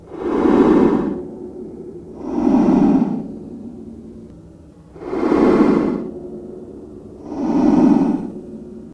This was a recorded sound of a heartbeat that I modified to be a man breathing underwater. This is part of a "Underwater" theme.
To make this sound I slowed down the track and used the effect "paulstretch". Then I cut it to make it sound like two breaths in and out. Finally, I speed up the final track to make it stressed, scared and nervous.
In the end, it sounds like a man breathing unterwater with diving equipment.
Made with Audacity.
Description de Schaeffer:
V : continu varié
La masse représente un son seul nodal
Type de son : angoissant, inquiétant, rythmé, posé
Son rugueux
Il y a un fond d'air en permanence qui donne une ambiance profonde et silencieuse comme l'océan. La respiration vient apporter une tempo en contradiction avec l'effet sous marin à priori tranquille.
La dynamique est très progressive, ce n'est pas un son violent. Le son démarre par une grande respiration.
Le son se divise en quatre parties : une montée, une descente, une montée, et une descente.